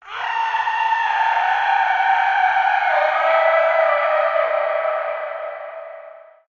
mujer hombre lobo
Voice edit, female to wolf
Horror, Scary, SFX, Sound-Design, studio, Suspense